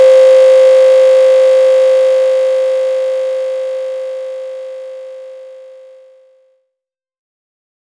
61 C5 Sine, hand made
Some C5 523.251Hz sine drawed in audacity with mouse hand free, with no correction of the irregularities. Looping, an envelope drawed manually as well, like for the original graphical "Pixel Art Obscur" principles, (except some slight eq filtering).
C5, sine-wave, harsh, handfree, noise, waveform, lo-fi